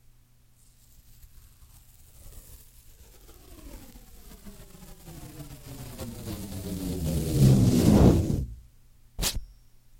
peeling tape off of a masking tape roll